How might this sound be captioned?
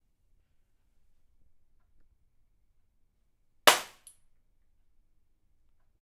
Glass Breaking
A Recording of a Glass Jar breaking on a tile Floor for A The Open Window Sound Design project, Recorded With A ZOOM H6